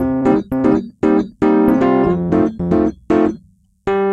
Rhodes loop 02 - 116
Rhodes MKII recorded at MusikZentrum Hannover
written and played by Philip Robinson Crusius
loop - 116 bpm